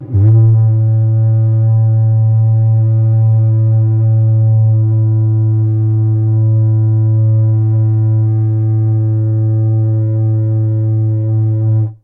Cardboard tube from Christmas wrapping paper recorded with Behringer B1 through UB802 to Reaper and edited in Wavosaur. Edit in your own loop points if you dare. Tibetan monk style, overtones and karmic goodness, jihad free.
cardboard, multisample, tube